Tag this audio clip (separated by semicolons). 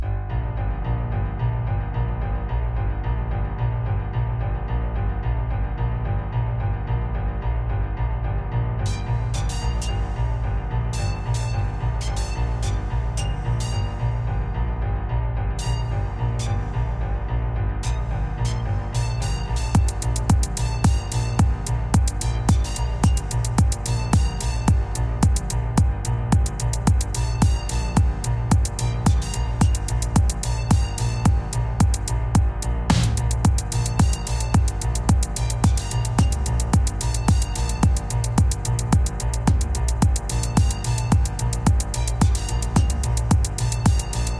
dance; runway-beat; high-fashion; loop; runway; fashion-beat; fashion; elegant; future; beat